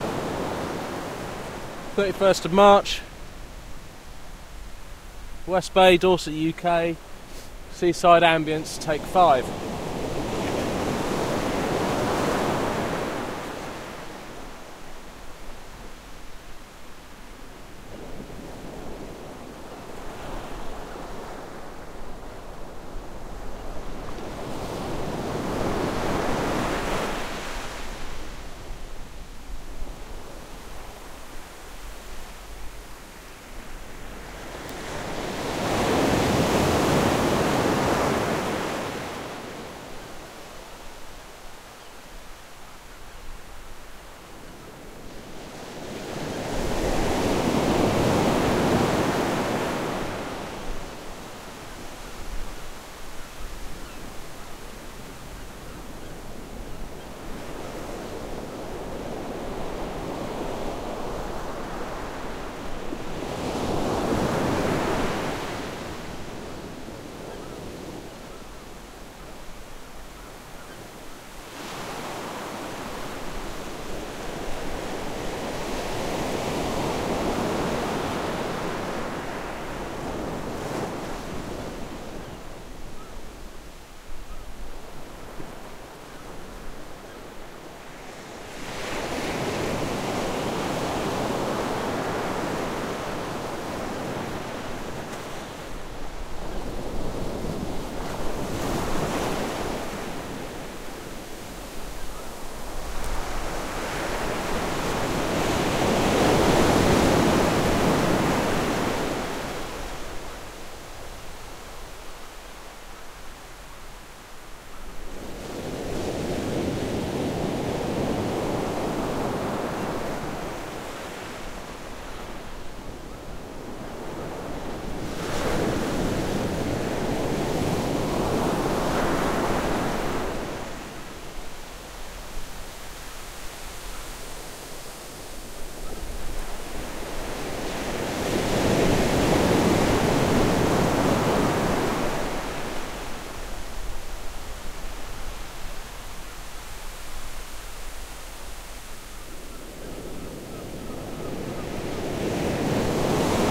CFX-20130331-UK-DorsetSeaBeach05
Sea Beach Ambience
Ambience, Beach, Sea